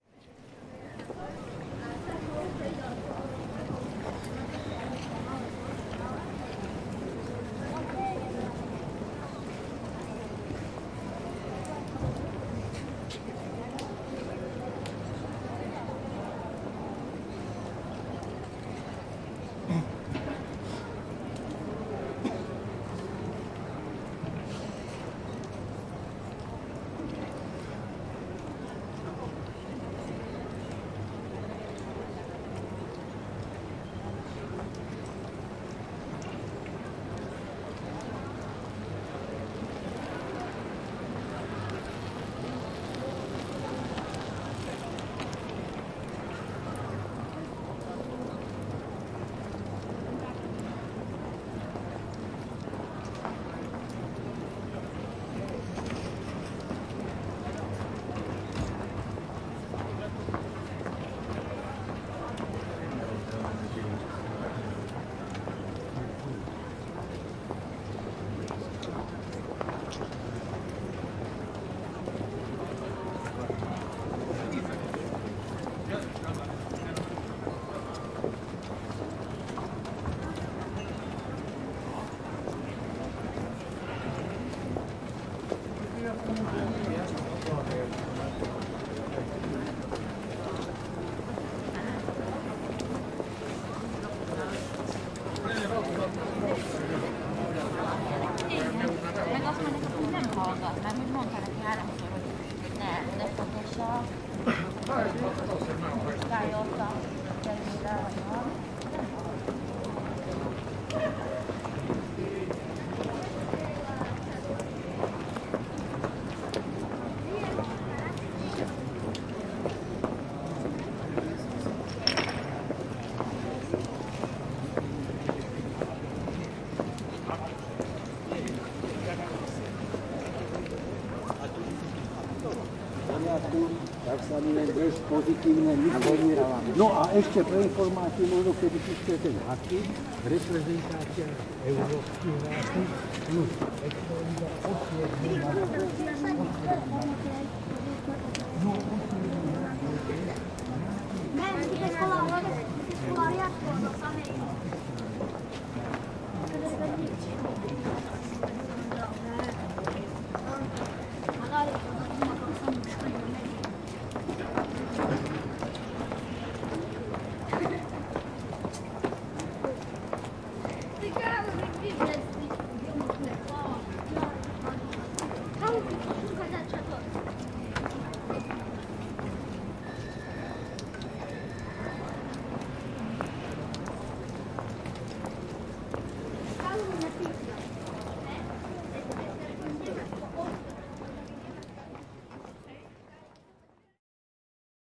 Recorded in wintertime at the city centre of Kosice in Slovakia, M/S